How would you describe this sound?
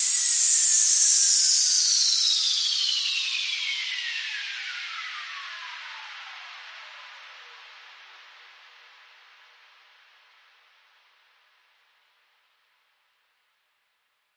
Noise Sweep Reso
sound-effect, sweep, sweeper, fx, sweeping, rising, effect, riser